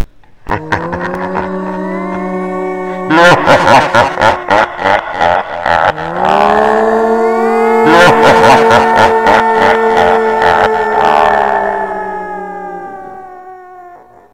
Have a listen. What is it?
moan7 ECHO TRIPLE DEMON CACKLE
moan 7 has a blend of moaning pitches with a demon cackle, blended and edited in audiocity by Rose queen of scream.